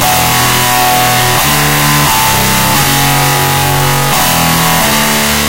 either DVS Digital guitar VSTi paired with a buncha VST's or the SLaYer VSTi.
but, processing, death, shredding, heavy, a, guitar, like, pc, guitars, metal